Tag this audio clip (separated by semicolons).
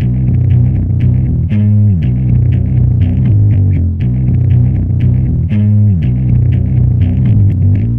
BASS; THRASH-METAL; METAL-BASS-RIFF